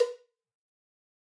cowbell strike 20
LP Black Beauty cowbell recorded using a combination of direct and overhead mics. No processing has been done to the samples beyond mixing the mic sources.
velocity, acoustic, dry, stereo, cowbell, multi, real, instrument